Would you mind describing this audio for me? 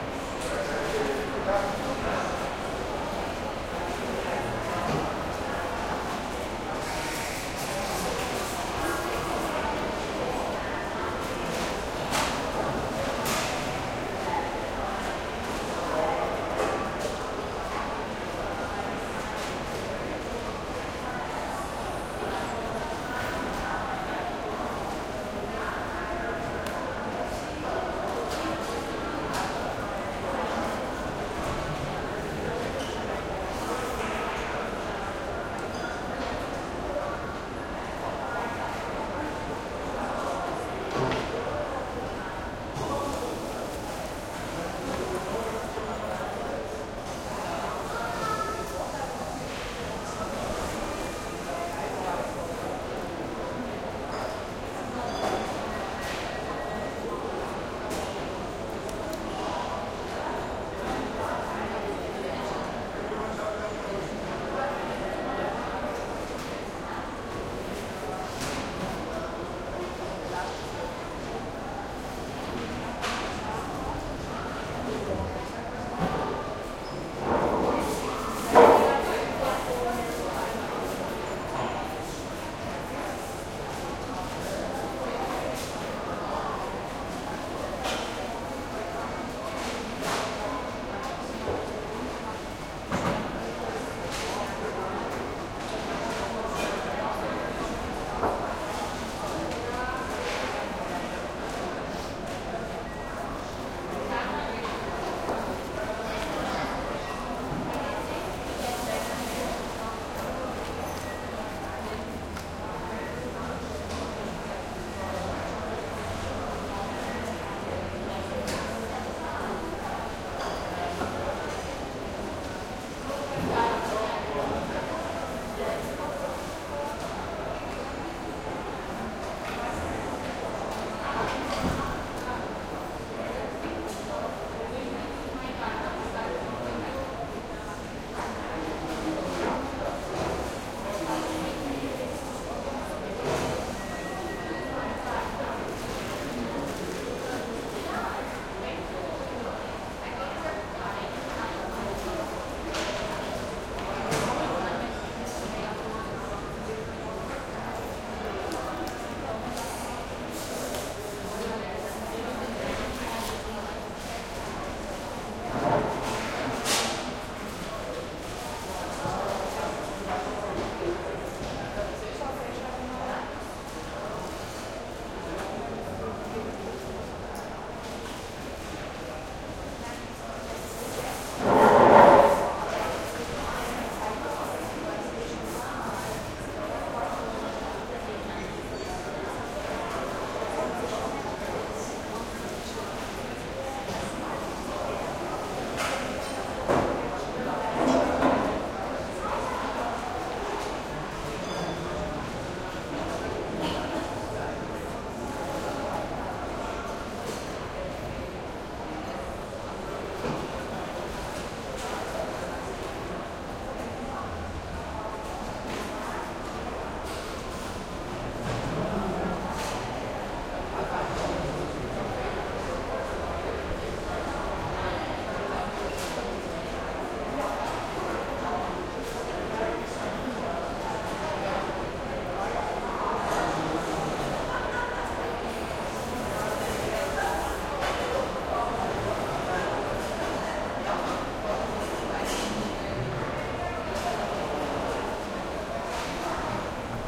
airport Zurich terminal lounge medium crowd heavy echo more movement and conversations and cleaning